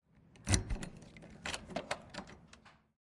Unlocking Door
Key going into door and unlocking
door, metaal, open